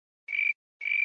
Isolated Cricket Loop
The link is:
Thanks for uploading that recording rsilveira-88. It's superb!